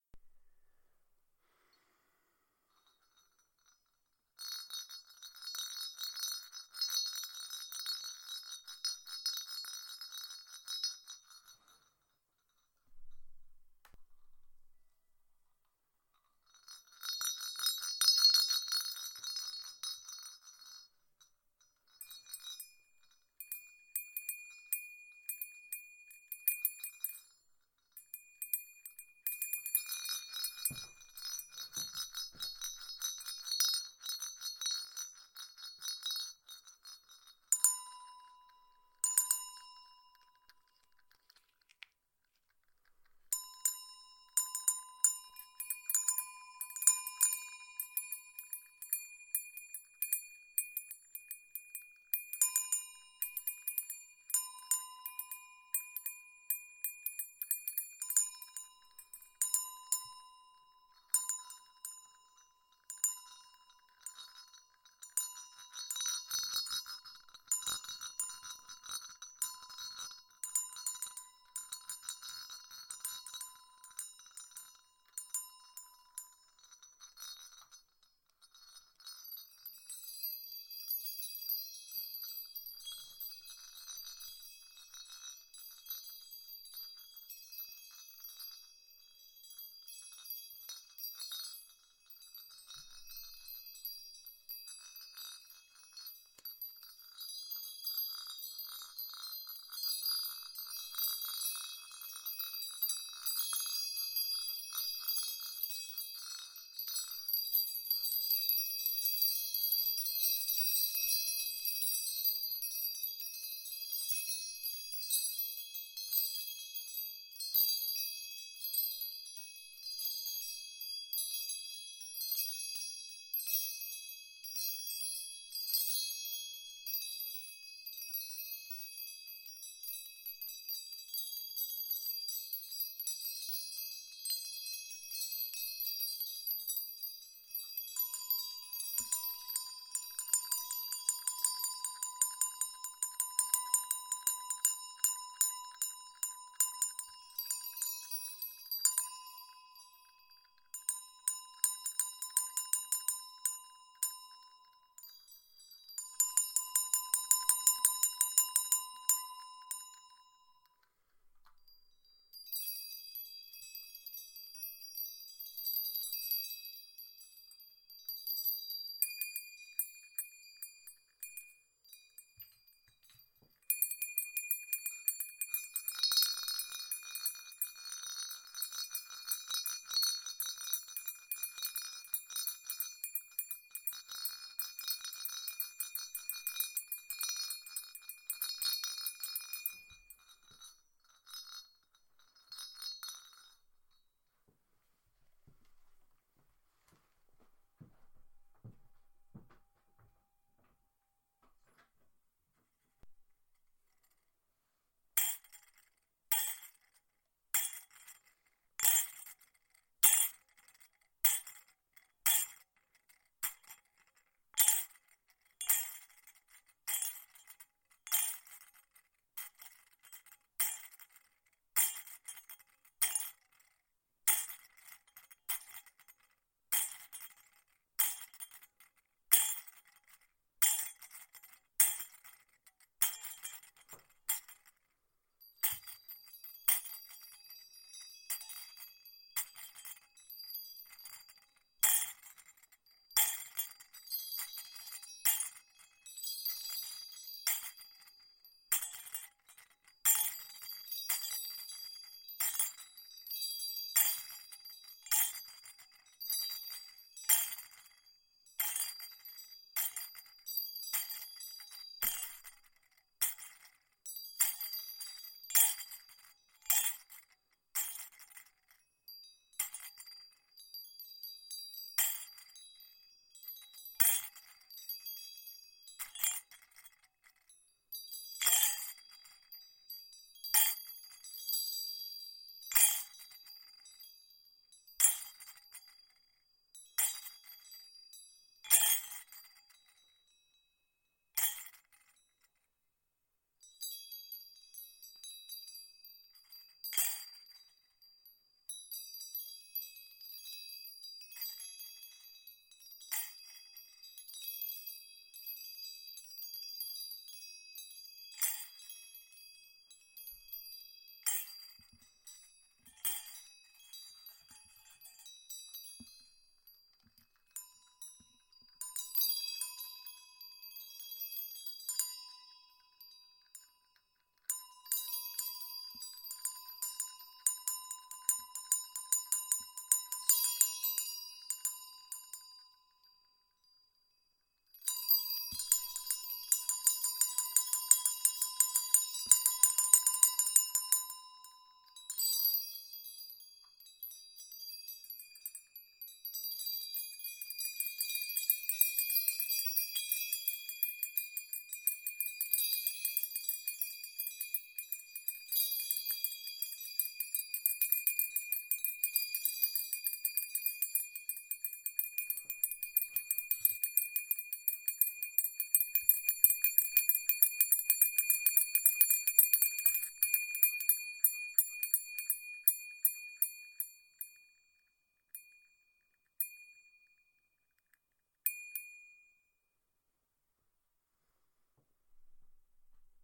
This is an ensemble of bells. Four different bells were used here. All small bells but with nice sounds.
I used this sound in the production of the album NEXT.
jingle, tinkle, percussion, ambient, bells, high, soft